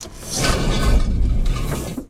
Large containment chamber opening to reveal the sound of molten liquid before closing again. Created for a game built in the IDGA 48 hour game making competition. Original sound sources: water boiling (pitched down and heavily filtered), running (pitched down and heavily filtered) rocks scraping together, bricks and pieces of metal being scraped across concrete. Samples recorded using a pair of Behringer C2's and a Rode NT2g into a PMD660.
computer, game, liquid, molten, smelter